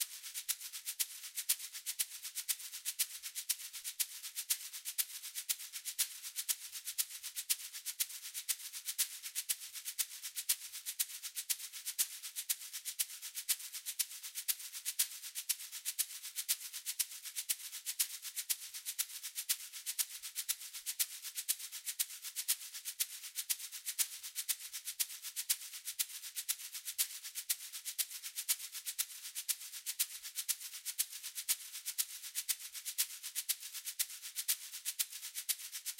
Song7 SHAKER Fa 3:4 120bpms
bpm, blues, loop, beat, Chord, rythm, 120, HearHear, Fa, Shaker